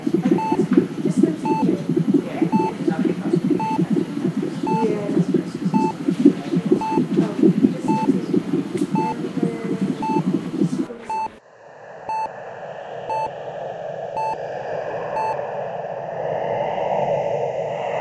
cue1-hospital
heart, hospital